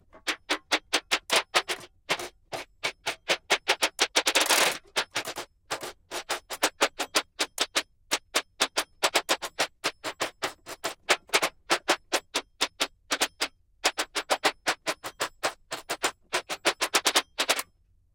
DeLisa Foley clicky robot talk 01
This is the under-current sound of a giant robot when he speaks. Made with a rain-tube by DeLisa M. White.
talking, robot, rain, tube